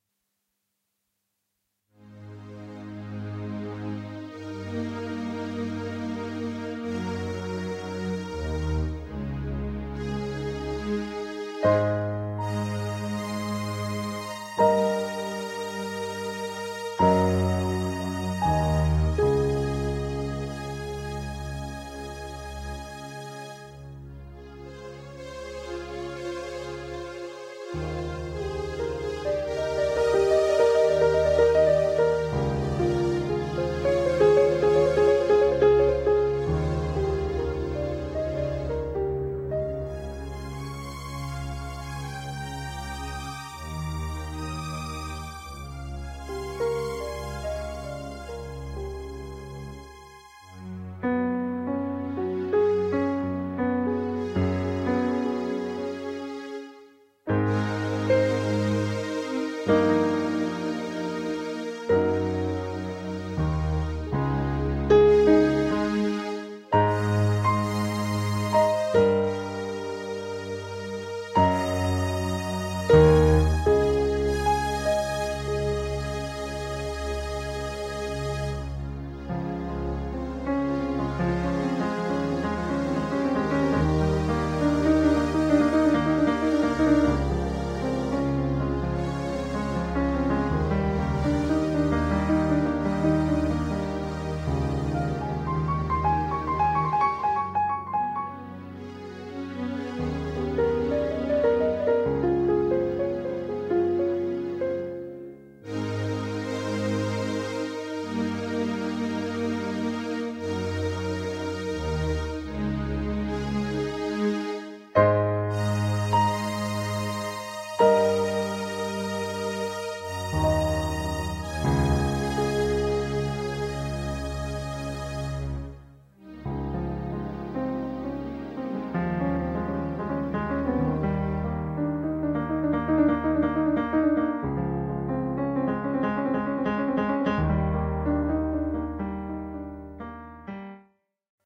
Hope springs
Uplifting melody piano and organ blend through Audacity. Ideal for romantic or reflective interlude
Background, Cinema, Creative, Film, Free, Interlude, Romantic, short